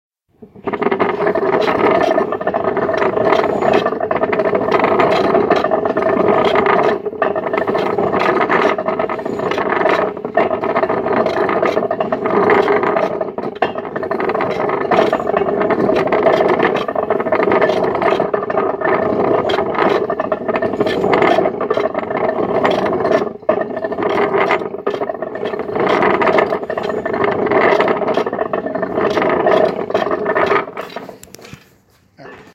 This is a recording of an 1870s-era cider press. It's hand-cranked. I used it for the sound of an evil conveyor belt.
Recorded on a Samsung Galaxy J7 while I save up for a real recorder.